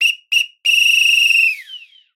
coach whistle 16 three short short fall
A coach whistle recorded indoors in a quiet room using a Audio-Technica BP4029 MS Shotgun Mic into a Focusrite Scarlett 18i20 Gen 2. Only the center channel was used. Samples were cleaned up with spectral noise filtering in iZotope RX. They were trimmed, faded, and peak normalized to -3dB by batch process in Adobe Audition. No EQ or compression was applied.
Sound Design, Music Composition, and Audio Integration for interactive media. Based in Canberra, Australia.
three, whistle, short, fall, coach